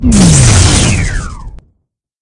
robot-death
IF YOU ARE GOING TO USE THIS SOUND, I'd love to know what for ;)
This is a sound made from samples from here as well as my own recordings. This is for a Videogame I'm working on for the free open-source RTS engine Glest Advanced Engine. The game is called Constellus.
Alien, electric, explosion, robot